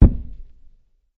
Large piece of wood being dropped 04
Large maple log being dropped on a concrete floor